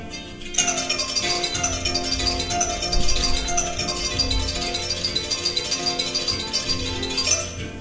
short fragment of African music played with kora, an harp-like West African instrument:
Recorded with Soundman OKM mics into PCM M10 recorder. Equalized and normalized
Kora, instrument, music, africa, strings